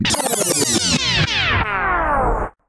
Vinyl rewind
► CHECK OUT MY WEIRD RAP SONG:
miles under – Armadillo
An emulation of the classic "rewind and stop" sound performed by DJs to add tension before the drop.
Made after tens of trials using FL Studio's Fruity Scratcher on a sample I don't remember.
LE: I'm happy this sample from 10 years ago helped so many people, cheers to all!